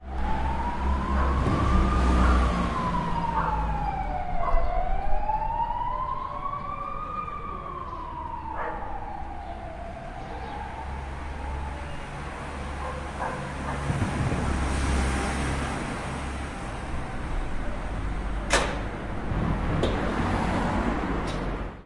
Motorbike, ambulance, dog barking, traffic.
20120326
motorbike, spain